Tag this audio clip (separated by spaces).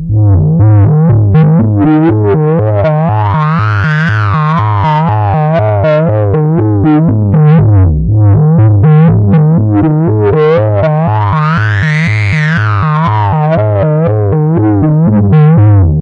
Synth Analog Bass